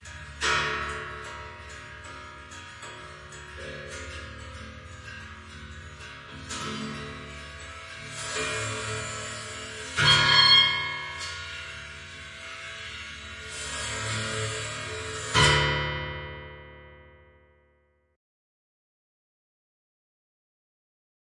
abstract metal hits JA
abstract metal hits
abstract, hit, metal